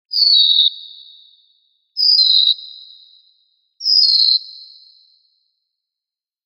Seagull/Eagle sound (3 pitches)
A sound of something like an hybrid of a seagull and an eagle for Thrive the game.
I made it in Fl Studio 10, scanning a triforce image in Harmor and playing with the knobs, I also used Vocodex and other Image-Line plugins.
Reverb and delay.